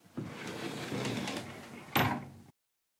Closet Door (sliding and hitting)
Sliding door closing
close, closet, closing, door, slide